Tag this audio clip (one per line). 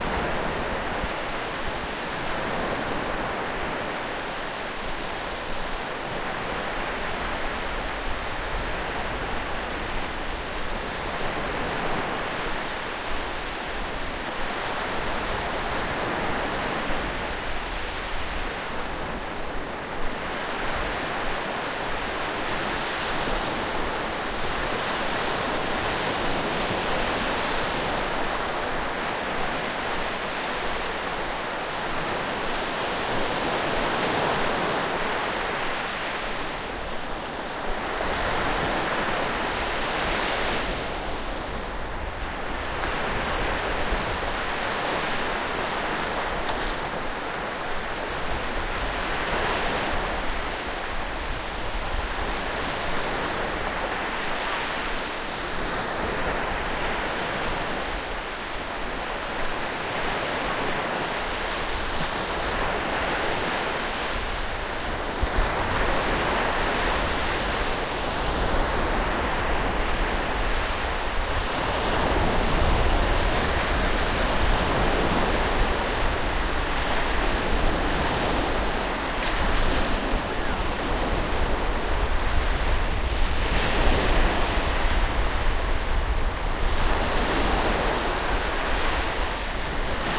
beach
coast
field-recording
ocean
sea
seaside
shore
surf
water
wave
waves